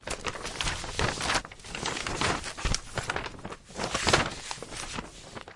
paper mix 1
Mixed sound of huge pile of papers processed.
shuffling, paper, office